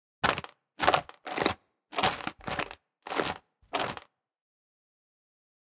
GASP Footsteps Crunchy
Sound FX for an actor moving on outdoor terrain.
Environment, Footsteps, FX, NPC